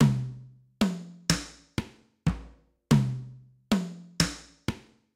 congas, ethnic drums, grooves